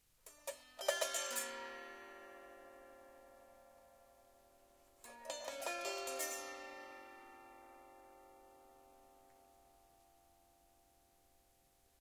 strings short melody
ethno, melody, string